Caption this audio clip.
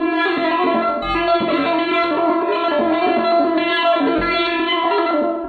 Mechanical Sequence 007
Another loop, but not as good as the previous one on this pack.
This time the input from the cheap webmic is put through a gate and then reverb before being fed into SlickSlack (a different audio triggered synth by RunBeerRun), and then subject to Live's own bit and samplerate reduction effect and from there fed to DtBlkFx and delay.
At this point the signal is split and is sent both to the sound output and also fed back onto SLickSlack.
Ringing, pinging, spectraly modified pingpong sounds result... Sometimes little mellodies.